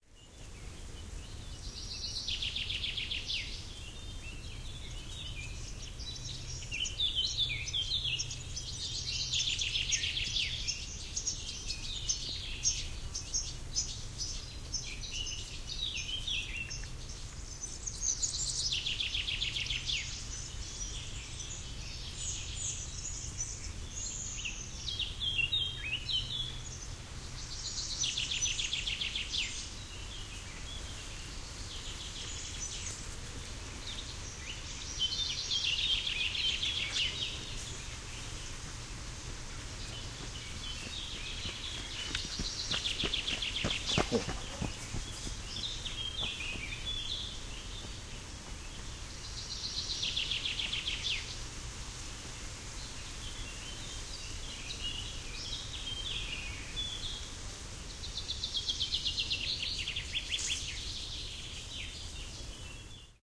running man
curiously sound of a man that run in a cross country recorded with the Marants pmd 660 and internal microphone
birds, country, man, running